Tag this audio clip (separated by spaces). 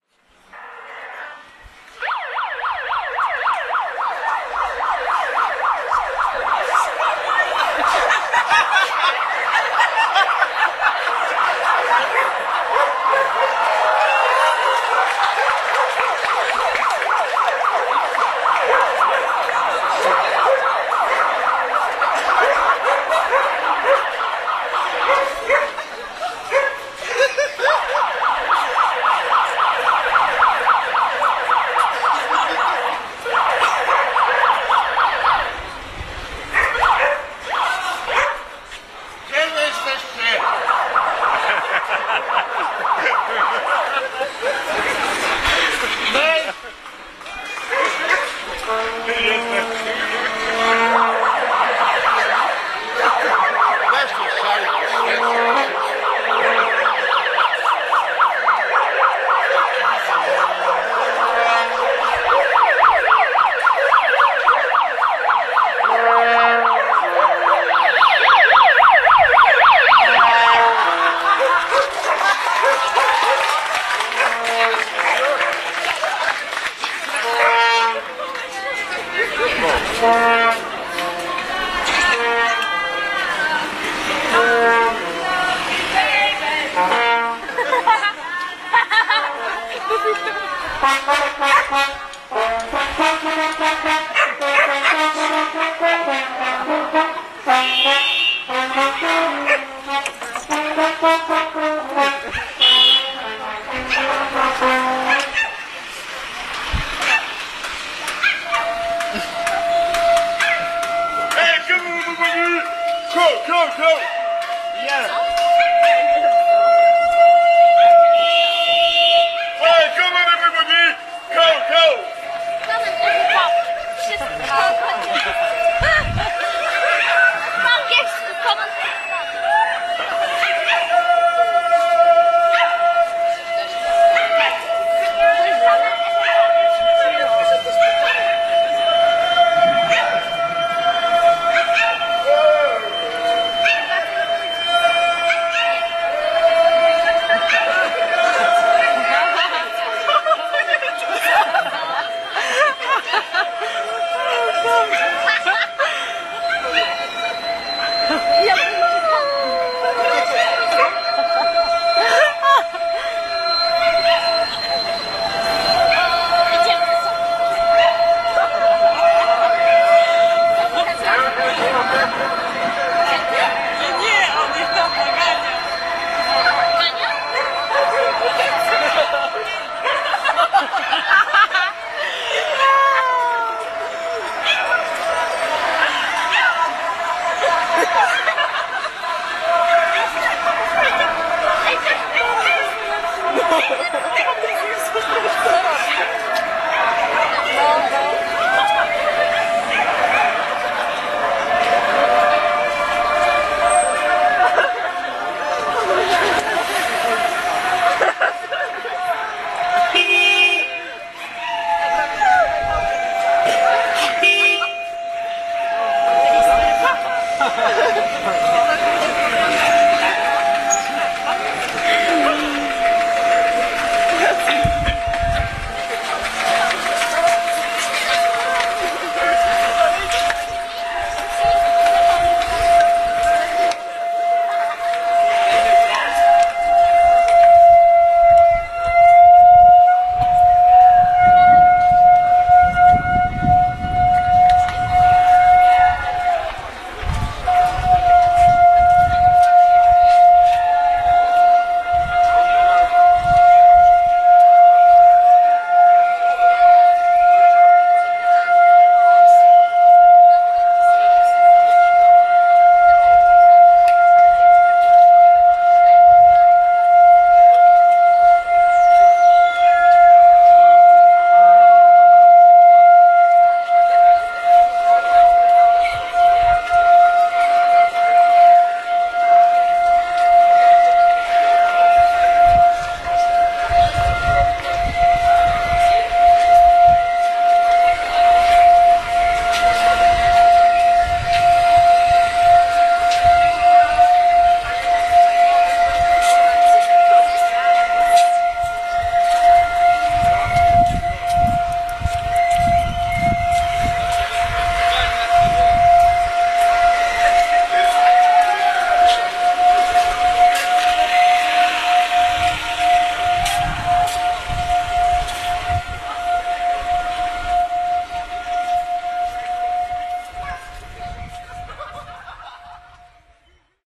crowd festival jeanne malta performance poland poznan simone street theatre